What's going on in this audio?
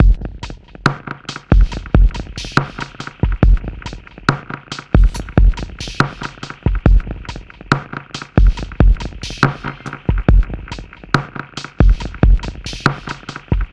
abstract-electrofunkbreakbeats 070bpm-rimshortrdub
this pack contain some electrofunk breakbeats sequenced with various drum machines, further processing in editor, tempo (labeled with the file-name) range from 70 to 178 bpm, (acidized wave files)
abstract, beat, breakbeats, chill, club, distorsion, dj, dontempo, downbeat, drum, drum-machine, electro, experiment, filter, funk, hard, heavy, hiphop, loop, percussion, phat, processed, producer, programmed, reverb, rhytyhm, slow, soundesign